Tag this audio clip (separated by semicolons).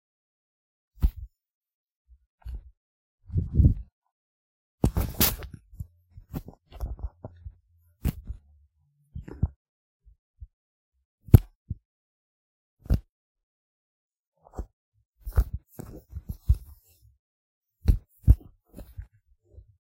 phone; mobile-phone; fumble